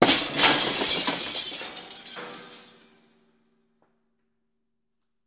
Windows being broken with vaitous objects. Also includes scratching.
break, breaking-glass, indoor, window